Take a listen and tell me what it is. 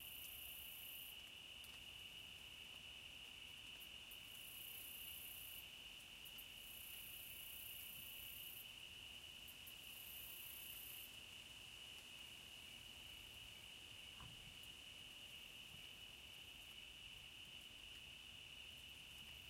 BEST USESES: Film ambiance / atmosphere
A field recording of night time ambience, very little wind noise. Easily loopable in production.
There is a very very light sound of rain in the file but could easily be overlooked and would be covered with dialog.
Other tech stuff:
Field recorded with a Zoom H4n at a 120 degree focus.